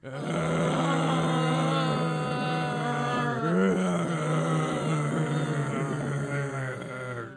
Multiple Zombie groans